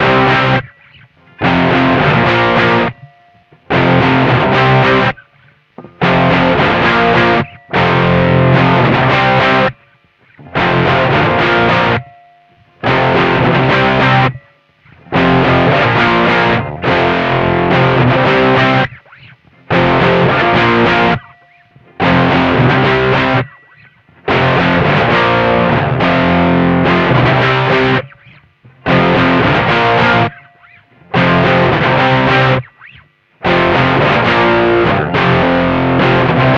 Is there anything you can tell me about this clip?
105-acdc-style-guitar-loop-01
acdc style chord riff progression type? 105bpm
guitar, rock, loop